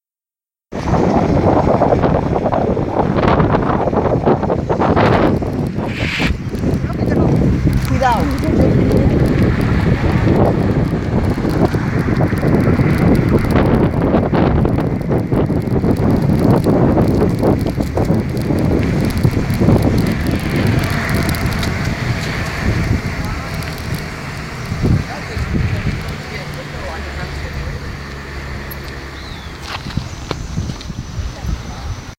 gutierrez mpaulina altafidelidad movimiento bicicleta
Sound of wind riding bike
bicycle, bike, downhill, rider, wheel